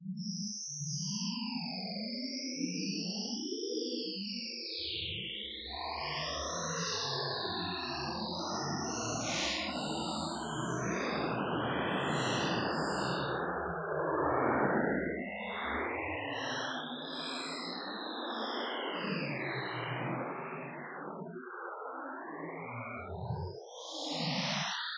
Untitled space sound created with coagula using original bitmap image.
ambient, space